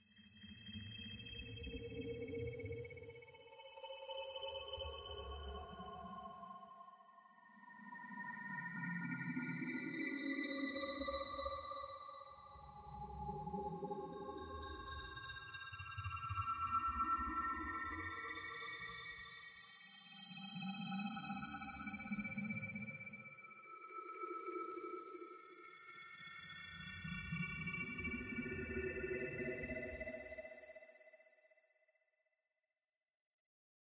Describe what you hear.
The first thing you hear after being abducted by aliens, according to mid-20th century movies in which the alien is just some guy in a robot suit.